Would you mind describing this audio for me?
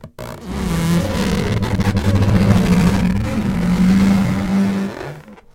je rubberman
Sound of rubber stretching, created from multi-tracked balloons being rubbed
balloon, stretch